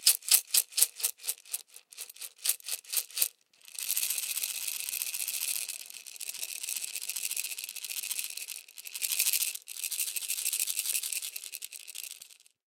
FX PEPPER MILL SHAKING
Shaking a pepper mill, sounds of whole pepper grains inside, medium quantity. Recorded with a Tascam Dr-40
kitchen, mill, pepper, percussive, shake, shaking, wood, wooden